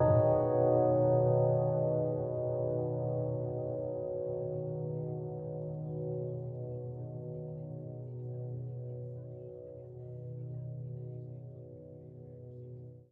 Fading piano chord without initial attack, bell like sound, rich chord
bell, piano, fading, chord, drone